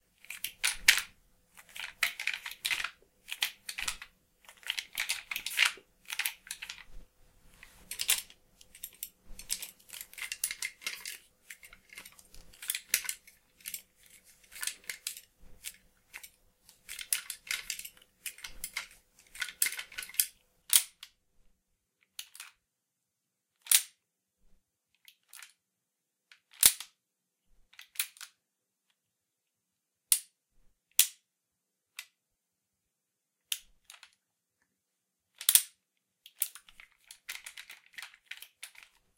revolver clicks 03
Random revolver clicks from a Colt 45 and a Colt Navy replica.